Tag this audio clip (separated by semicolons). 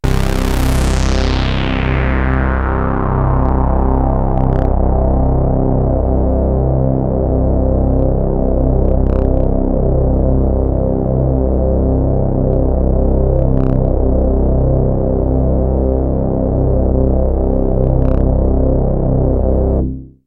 dave; sample; smith